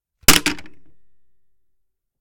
old bakelite telephone hang up handset
The sound of an old bakelite telephone, the handset is hanged up.
Recorded with the Fostex FR2-LE recorder and the Rode NTG-3 microphone.
bakelite, fostex, fr2-le, handset, hang, ntg3, old, phone, receiver, rode, telephone, up